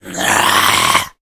A voice sound effect useful for smaller, mostly evil, creatures in all kind of games.